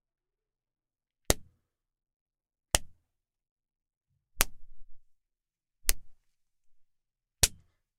Tapas em diferentes partes do corpo, captados em ambiente controlado com microfone Neumann TLM103(Condensador, Cardioide); pertencente à categoria de Sons Humanos, de acordo com a metodologia de Murray Schafer, dentro do tema de sons de combate ou luta.
Gravado para a disciplina de Captação e Edição de Áudio do curso Rádio, TV e Internet, Universidade Anhembi Morumbi. São Paulo-SP. Brasil.
Tapa, Bofetada, Luta, Briga